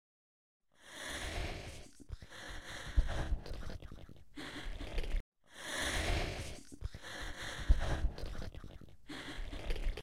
Femmes whispers
Female voices treatments
female, voices, voice-treatmeants, whispers, women